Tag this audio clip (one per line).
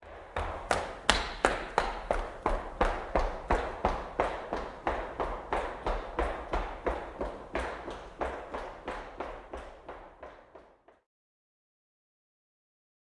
footsteps
walking